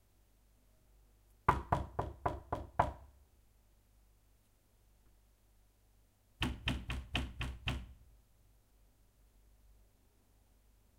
Knock Door
closed, door, hit, knock, knocking, wood